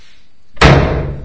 S05=09=11=12 door-slam
Door closing hard sound, modified.
close, door, slam